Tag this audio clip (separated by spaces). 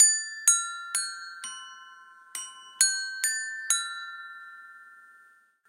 bell big-ben chimes church-bell london phone ringtone telephone